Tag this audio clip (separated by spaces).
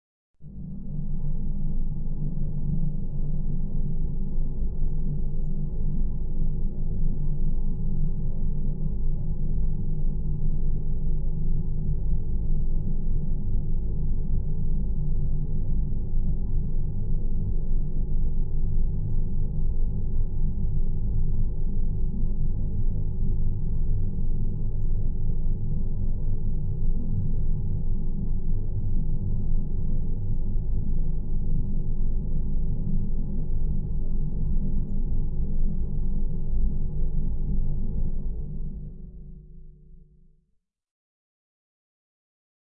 impulsion dark drone energy soundscape starship pad ambience atmosphere noise ambient hover sci-fi machine rumble future spaceship sound-design deep engine bridge fx drive space electronic effect emergency Room futuristic background